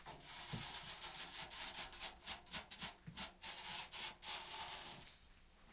Slide and brush scrapes
hits, random, taps, variable, thumps, scrapes, brush, objects